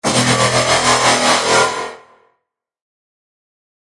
Dub Wub-1
EDM, ableton-live, bass, dubstep, electronic, excision, processed, sampled, synthesized, tremolo